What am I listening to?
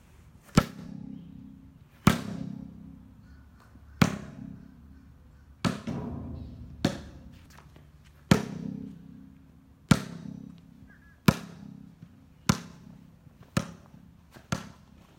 bouncing ball small echo
The sound of our basketball bouncing in a tall-roofed structure that produced a slight echo on the metal ceiling. Enhanced the echo noise with a reverb effect.
bouncing, bounce, dribble, ball, concrete, basketball, sport, basket, banging, dribbling